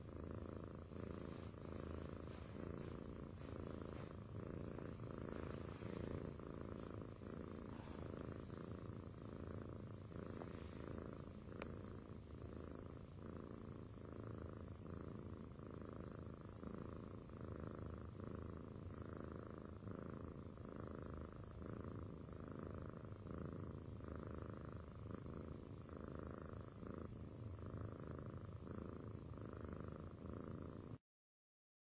cat purr
cat, domestic, purr